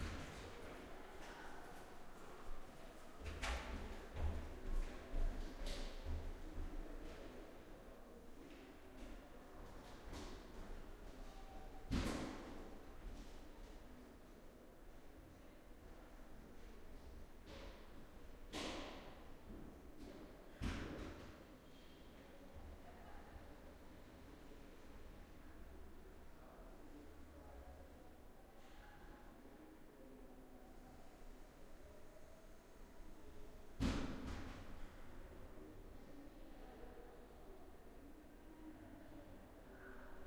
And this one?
Queneau ambiance couloir calme 02
prise de son fait au couple ORTF dans un couloir de lycée, pas, calme
crowd, field-recording, foot, hall, people, step, voices